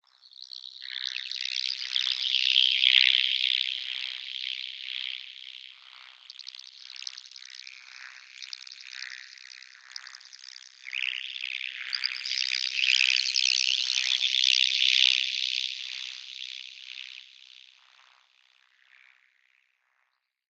alien bug sound
alien, synth, insect, fx, bug